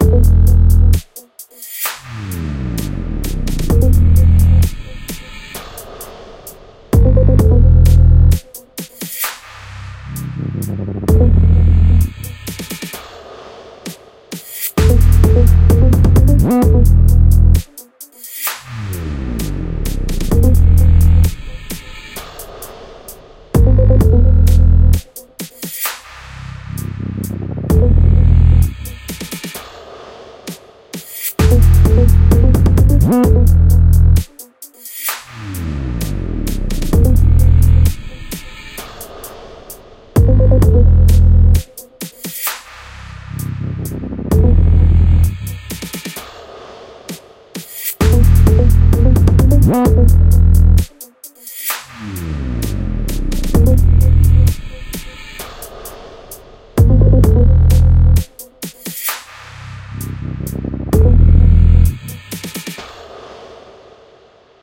130bpm - FLStudio - 12 instruments
Features:
Thick, heavy 808 bass
sci-fi sfx/ambience
808 kick
short tick (hihats)
reverse cymbal
reverberating (echo/delay) clap
long breaths
light synth melody
beat, dark, synth, techno